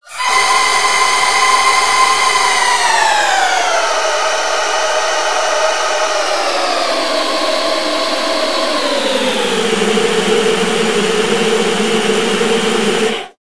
granny demonic descent
Created with Granulab from a vocal sound. Descending higher pitch.